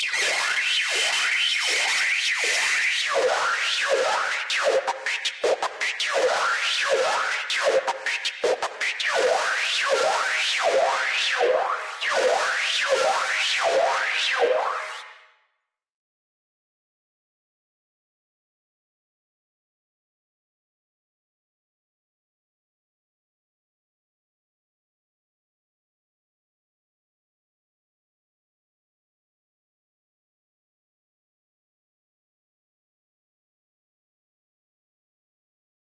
jovica flowerLoop-80 bassline reverb
texture, sphere, atmos